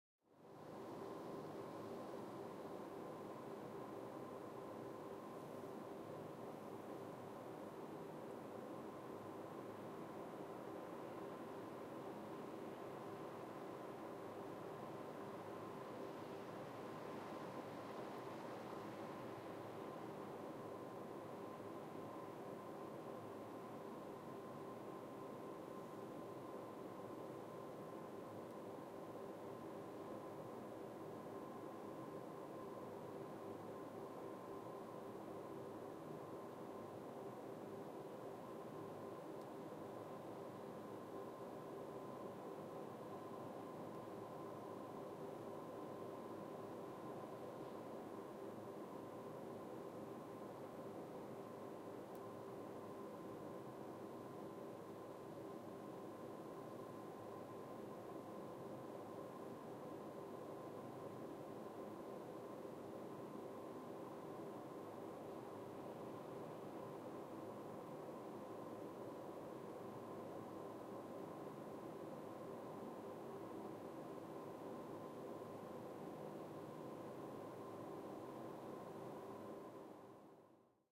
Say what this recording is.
Distant factory at night. Recorded with a Zoom H1.
ambience, roomtone, industrial